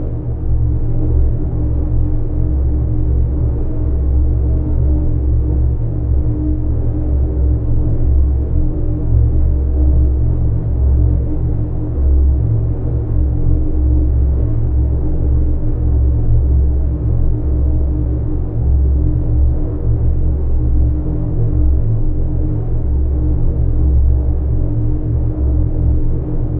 EngineRoomPipeNoise4 Loop
Seamless loop suitable for use as background vessel or ambient environment noise. This one has a more harshly fed-back delay structure. It's low-pass filtered noise with multiple complex delays with feedback that have a harsh but stereo-correlated effect forming a soundscape with the impression of metal, pipes (large tubes), and perhaps the engines of some fictional vessel. Created with an AnalogBox circuit (AnalogBox 2.41alpha) that I put together, and then edited for the looping in Cool Edit Pro.
abox, ambient, background, engine, loop, metal, noise, pipe, synthetic, tube, vessel